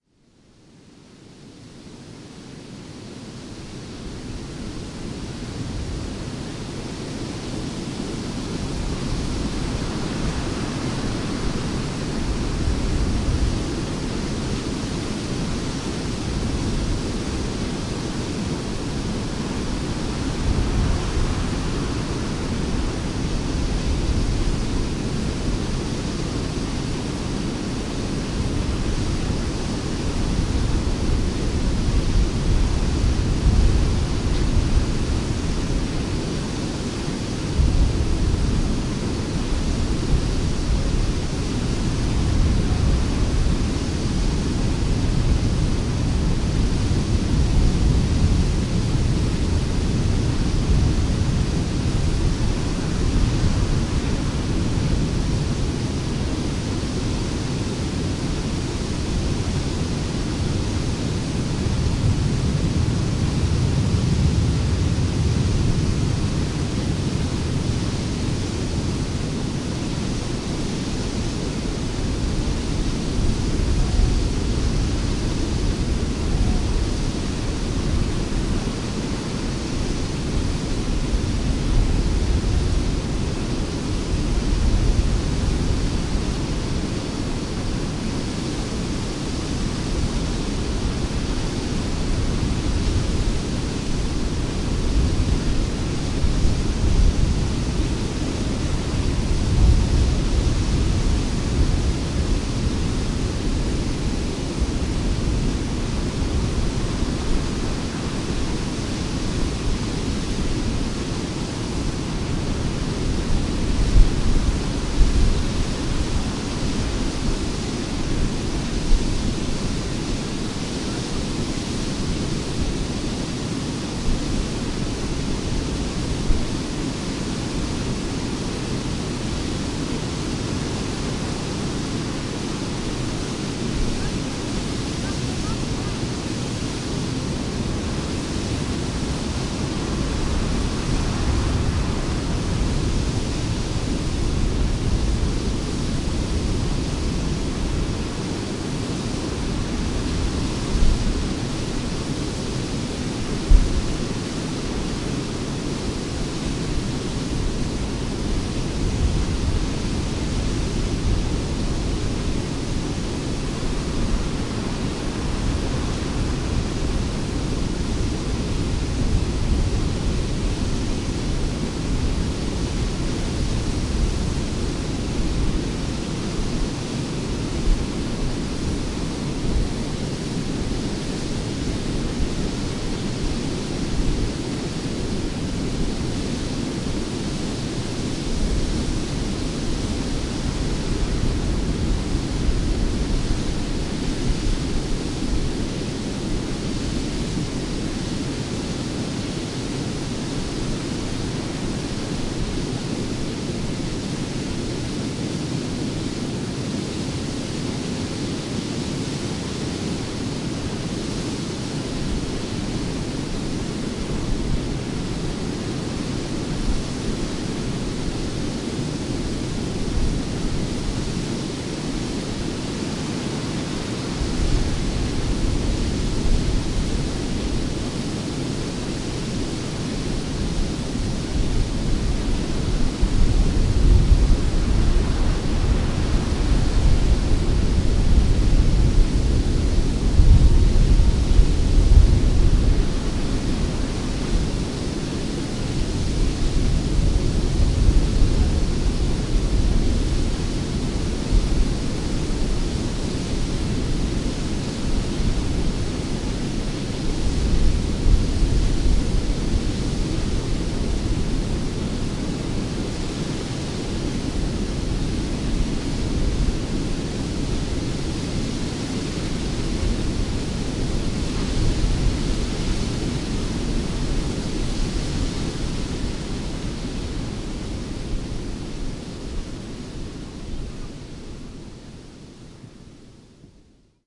07.Mealt-Falls-Cliff
Recording of the Mealt Falls (waterfall falling into the see from high cliffs on North Skye). Sound of the falls, sea underneath and wind recorded from a side of the cliff nearby the viewpoint.